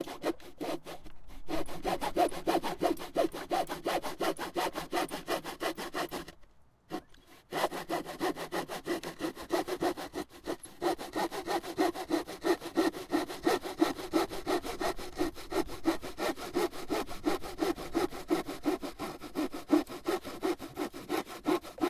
hand saw cutting
Cutting a board with a hand saw. Some occasional wind.
mill, hand, board, cut, saw